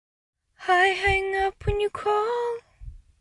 'I hang up when you call' vocal sample (1)
A female voice singing the lyrics 'I hang up when you call'. There are three takes of this clip, as per request.